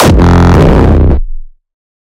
Hardstyke Kick 19
Hardcore, distorted-kick, Hardcore-Kick, distrotion, Hardstyle-Kick, Rawstyle-Kick, bassdrum, Hardstyle, layered-kick, Rawstyle, Kick